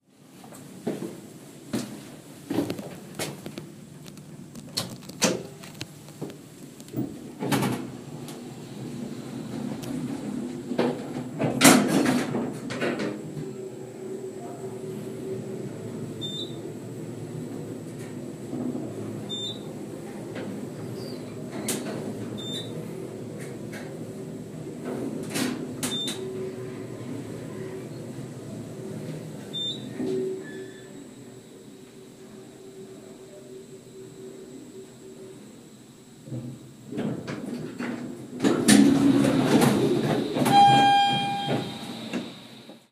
Chinatown Hotel Elevator
The sound of the elderly lift in use, in a Chinatown hotel